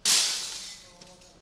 Glass smashed by dropping ~1m. As recorded.
broken, field-recording, glass, smash